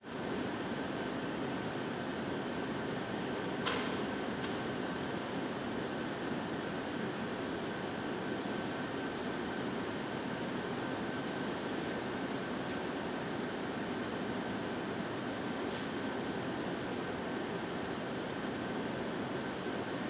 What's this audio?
Air conditioning 1
Air conditioning ambience recorded indoors. Recorded with an app on the Samsung Galaxy S3 smartphone